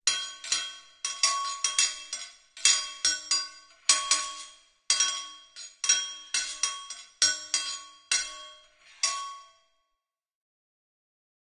in the smithy 2
A little collage made with the anvil sounds I uploaded.